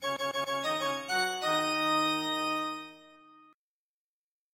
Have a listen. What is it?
A simple midi for a victory screen of a game level.